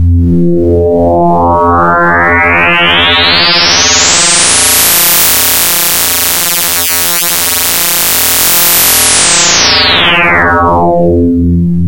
Used Blender 3D to create a horizontally seamless image texture. Then imported the image in audio editor as raw. This is result.Discussion about this technique on the forum.
synthetic, phasing, experimental, image2wav, sweep